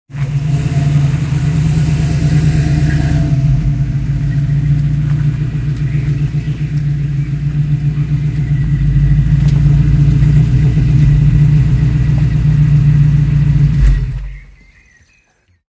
Sportscar Mustang, Recorded on little village Street in the north of France